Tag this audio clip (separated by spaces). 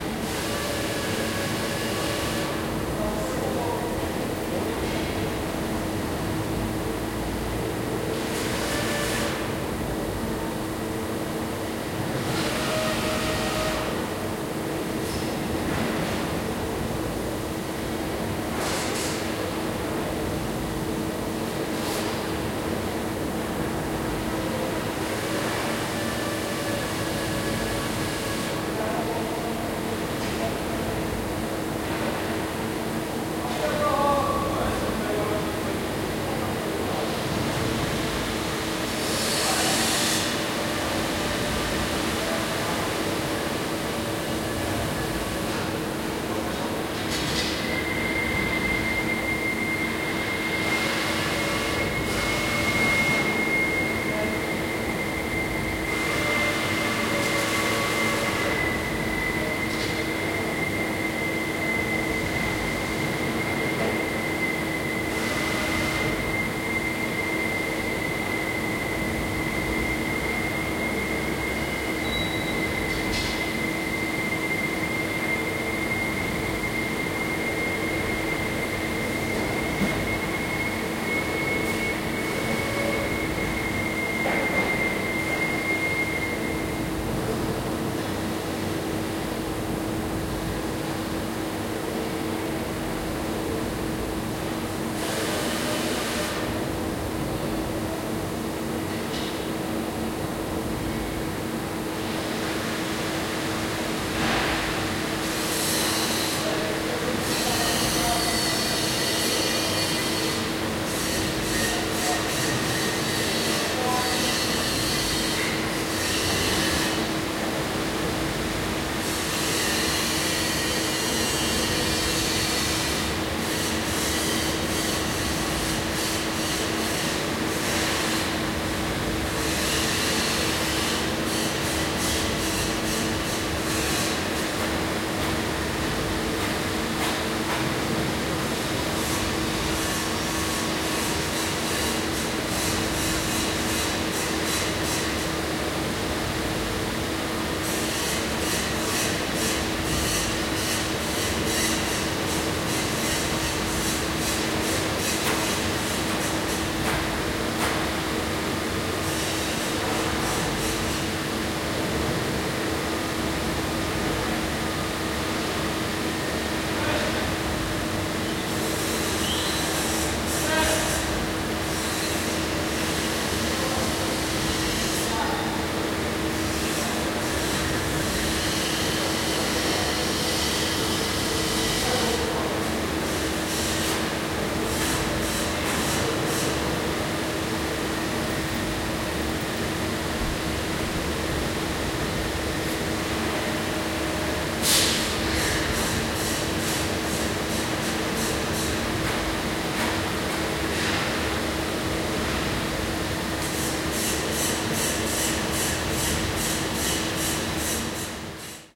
ambiance ambience ambient atmosphere background city factory field-recording interior metal soundscape